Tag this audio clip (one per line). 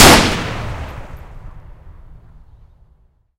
fire-arm
firearm
firing
gun
machine-gun
munition
pistol
rifle
shoot
shot
weapon